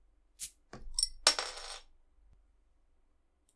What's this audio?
opening a bottle
this is a sound of a beer bottle being opened. also has the cap hitting the table.
use how you like.
alcohol, aluminum, opened, Pub, beer